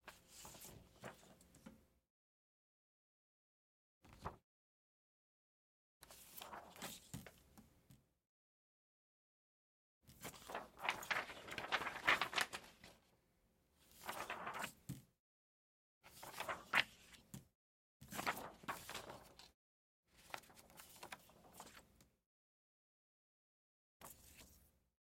Overhead Projector Putting Transparency On
Sliding a transparency onto the surface of an overhead projector.